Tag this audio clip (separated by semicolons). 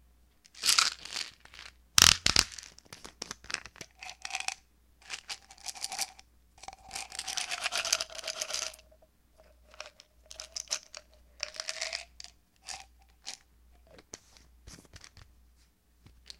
bottle; noises; pill